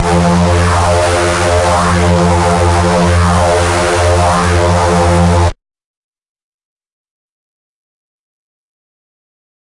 multisampled Reese made with Massive+Cyanphase Vdist+various other stuff
reese, distorted, processed, hard